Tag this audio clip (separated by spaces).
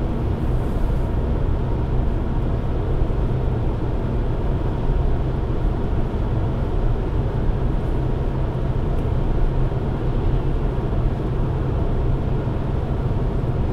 recording inside fast engine mono normal cabin 16bit 44100khz driving car diesel